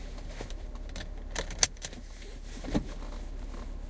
car seatbelt 2
Honda CRV, interior, seat belt being fastened. Recorded with a Zoom H2n.